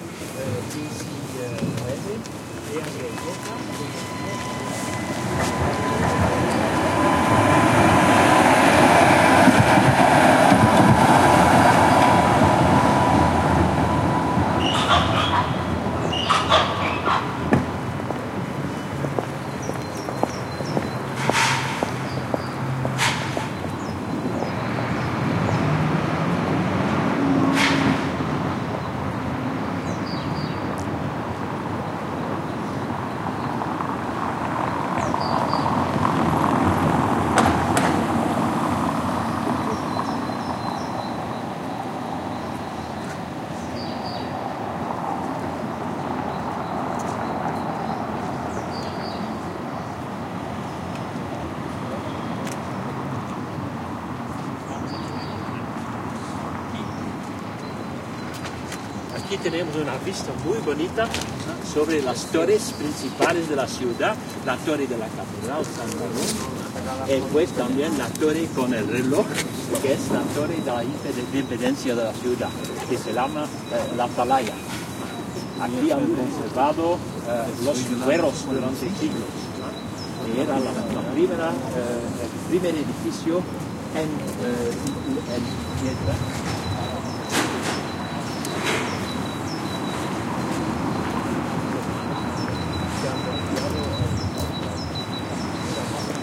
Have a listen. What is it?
20100402.Gent.Street.01
tramway passing by, footsteps, traffic noise, and a bird singing. Near the end, male voice talks about the place in Spanish with strong Dutch accent. Some wind noise. Recorded near Sint Baafskathedraal, Gent (Belgium) using Olympus LS10 internal mics
ambiance, belgium, field-recording, tramway